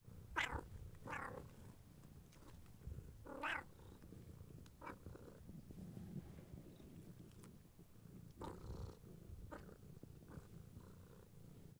05-01 Cat Shouts and meowing
Cat_Shouts and meowing
CZ, Czech, Pansk, Panska